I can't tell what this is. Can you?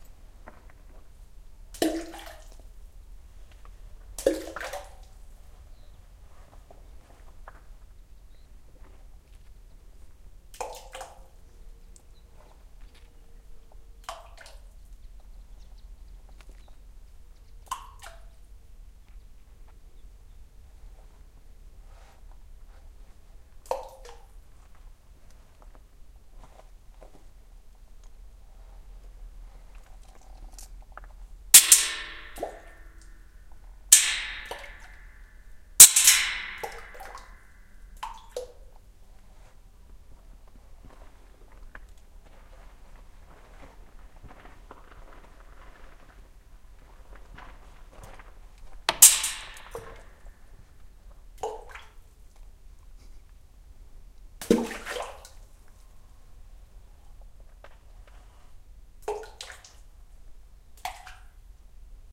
pebbles falling to water + noises of metal being struck. Shure WL 183, Fel preamp, PCM M10 recorder. Recorded at the roman theatre of Casas de Reina, near Llerena, Badajoz (S Spain) with Shure WL 183, Fel preamp, PCM M10 recorder. The place has excellent acoustics, as good as you would expect in a 2000-yr old Roman theatre